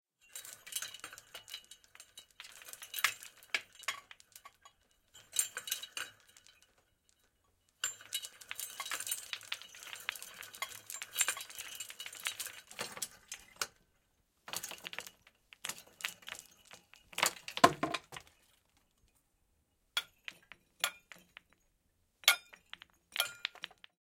Recoreded with Zoom H6 XY Mic. Edited in Pro Tools.
An old chandelier with funky construction shaking. Could be used to imitate an earthquake or something like that.